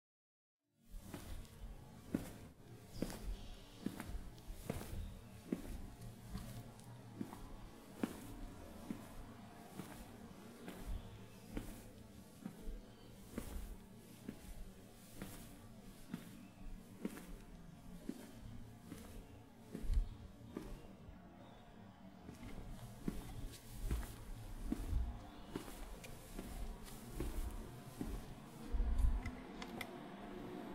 Walking on a cement floor. Recorded on a sunny day in Brazil. Recorded with a Rode NTG2, on a Zoom H4N. Daw used: Adobe audition.